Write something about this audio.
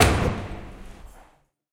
Door Natural Reverb
Door sound registered in a voyage intercity: A Coruña-Ferrol Galice, Spain.
machine, door, alarm